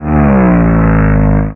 Spaceship Flyby 2
The queer spacecraft soars through deep space, its engines rumbling. If this describes your sound needs you've found the perfect sound! Could also pass as a ambient effect. Made by paulstreching my voice in Audacity. I always appreciate seeing what you make with my stuff, so be sure do drop me a link! Make sure to comment or rate if you found this sound helpful!